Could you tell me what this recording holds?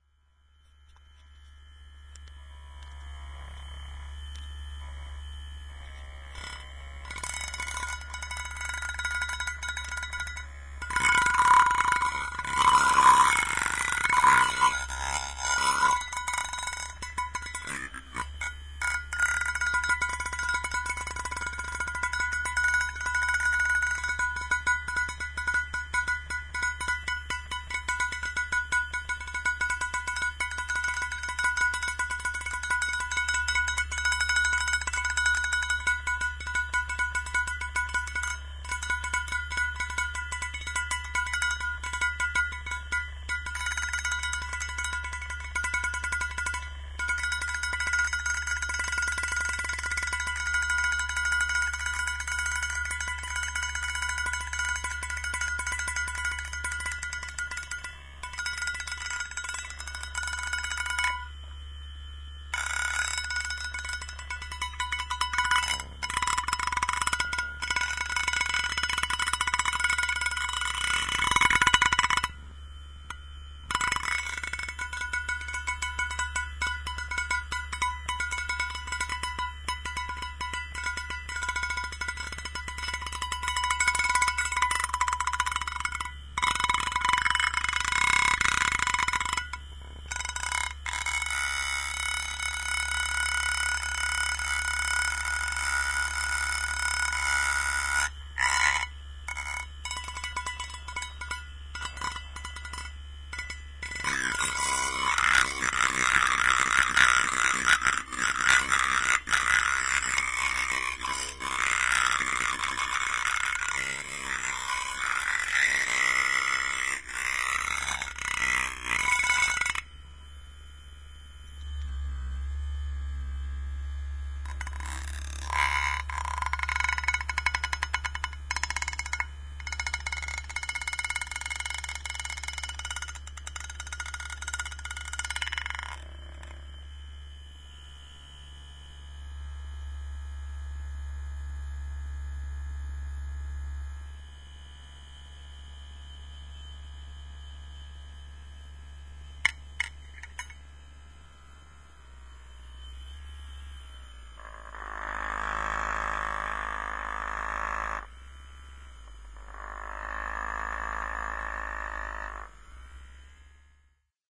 this recording was recorded with a sylvania smp1015 mp3 player
the sound here is of a usb neck massager that was vibrating on the lid of a mint tin can making this metal sound in a sort of rhythmic style
the sound was amplify in awave studio and cut and prepared in cool edit
motor-vibration, metal-can, metal-noise, usb-powered-massager, motor, noise, rattling-sound, mint-tin-can, electric-motor